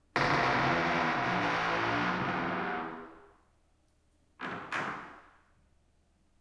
Heavy Door Creaking 03
Heavy door groan and creaking in reverberant space. Processed with iZotope RX7.)